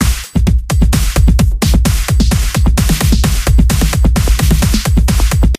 dance with me
sound sample loop
beat, dance, disko, Dj, hip, hop, lied, loop, rap, RB, sample, song, sound